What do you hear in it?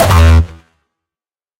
a Kick I made like a year ago. It has been used in various tracks by various people.